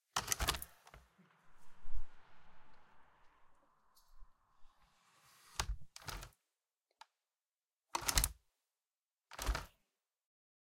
Opening a modern window with a mechanical window handle, then a a bit of ambiance on the outside, closing the window again and locking it by turning the handle. Soft, mechanical sound.